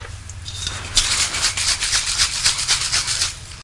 Spray Can Shake
Shaking up the insides of a spray can
shake, spray-can, spray, can, shaking